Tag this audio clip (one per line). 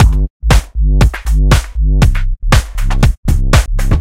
120bpm
acid
beat
b-line
break
dance
electronic
loop
tune